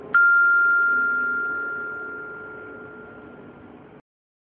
I couldn't find any real and free glockenspiel sounds,so I recorded my own on my Sonor G30 glockenspiel with my cell phone...then I manipulated the samples with Cubase.I hope you like them and do whatever you want with them!